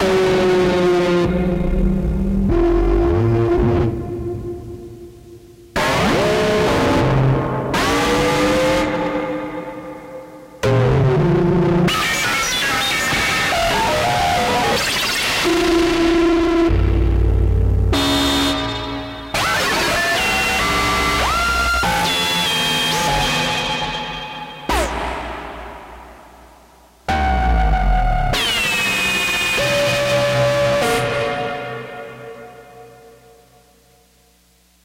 Circuit 7 - Synth 3
Synth Loop
124 BPM
Key of F Minor
hardware, synthesizer, noise, lofi, bass, downtempo, electronic, experimental, industrial, beat, ambient, psychedelic, loop, dance, oregon, dark, analog, music, evolving, electronica, percussion, sample, digital, synth, processed, portland